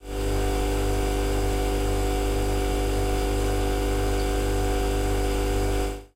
This is a domestic fridge motor recorded in stereo using a Rode NT4 and an Edirol R-09.